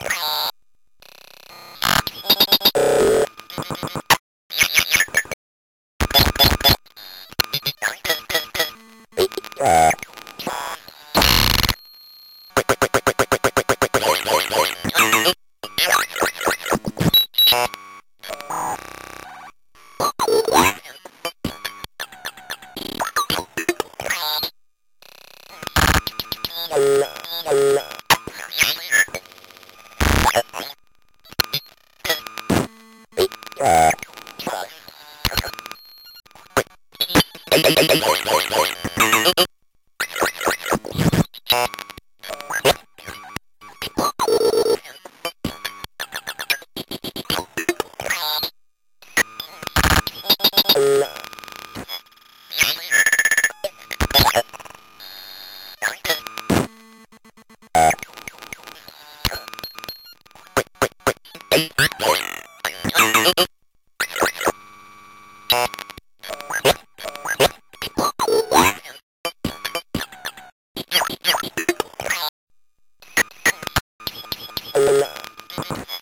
Speak Live Cut 03
My circuit bent speak and spell run through the live cut plug-in. Tons of possibilities here to cut it up for one shots are use bigger pieces for loops.